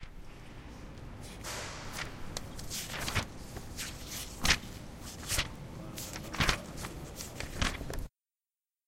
In this sound someone is turning the pages of a catalogue or a newspaper. It has been recorded with the Zoom Handy Recorder H2 very closely to the sound source. It has been recorded in the hall of the Tallers building in the Pompeu Fabra University, Barcelona. Edited with Audacity by adding a fade-in and a fade-out.
newspaper, catalog, paper, book, UPF-CS14, read, tallers, hall, turn, page, university, campus-upf